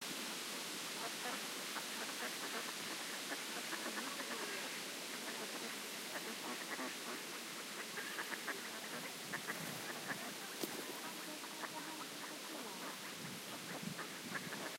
Ducks, wind, people
Ducks quacking and some sounds of the people feeding the birds.
duck, people, wind, quack, birds, nature, field-recording